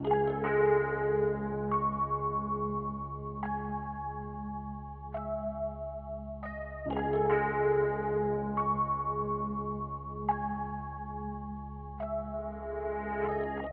Much love and hope the community can use these samples to their advantage.
~Dream.